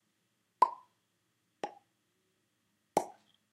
3 Popping Pops
Three simple pops for bubbles, balloons, etc.
balloon, bubble, game, game-sound, gentle, mouth, pop, popping, soft, sound, video, video-game, vocal